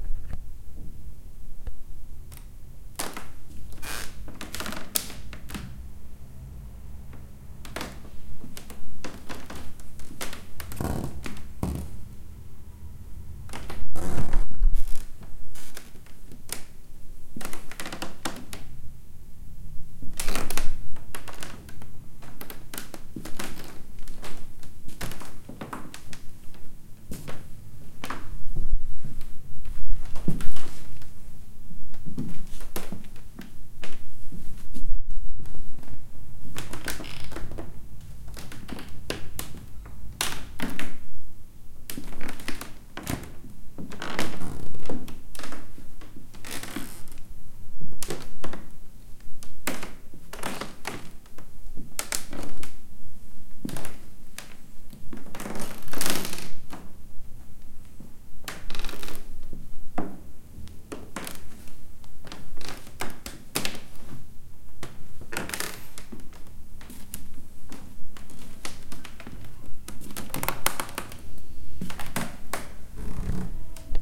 Sneaking on wooden floor
Walking slowly on a cranky wooden floor with my sleepers.
Recording device: Tascam DR 22WL
Software: Logic Pro X